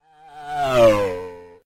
space race car 2
Aliens version of a NASCAR race car.
car, aliens, explosion, beat, snore, nascar, ship, space, race, fart